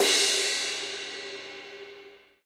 02 Crash Thin Cymbals & Snares
2A, 5A, 7A, Brahner, click, crash, cymbal, cymbals, drum, drumset, drumsticks, hi-hat, Maple, Oak, snare, Stagg, sticks, turkish, Weckl